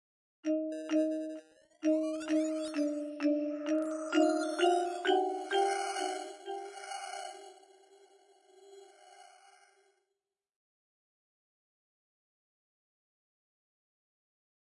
A marimba with multiple effects applied